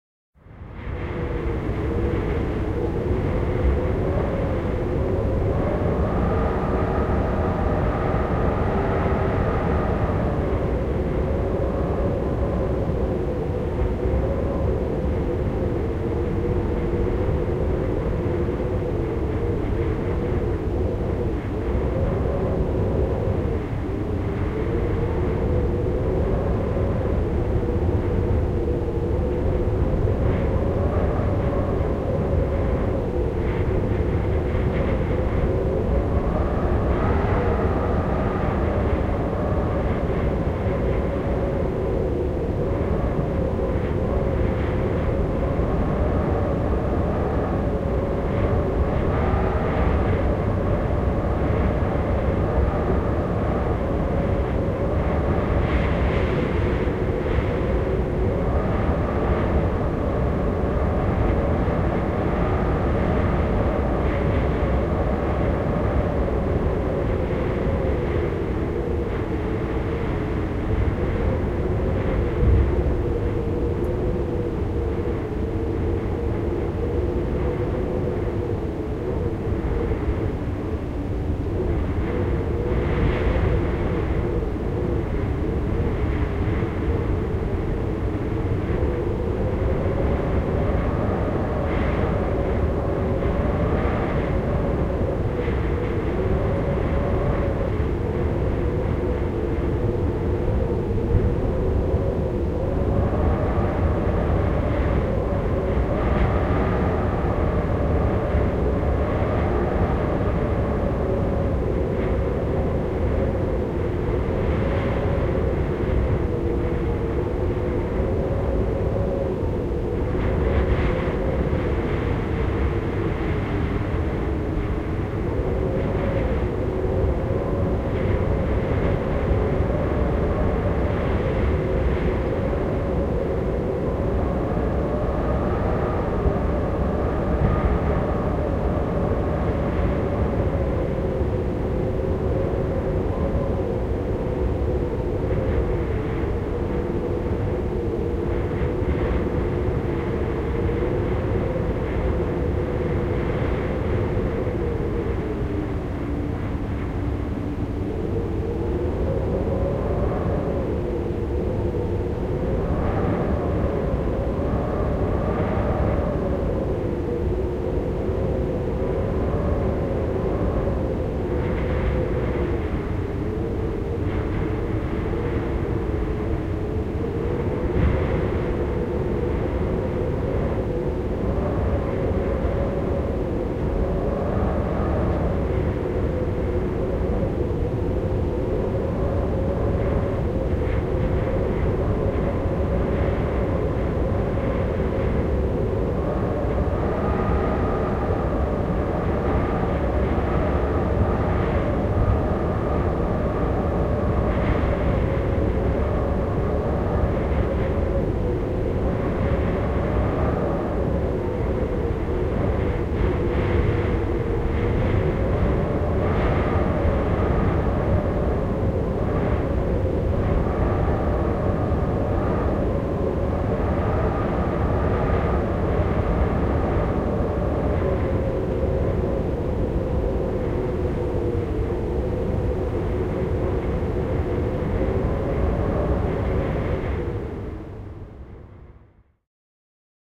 Puuskittainen, humiseva ja ulvova tuuli nurkissa.
Paikka/Place: Hollanti / Holland / Makkum
Aika/Date: 19.09.1991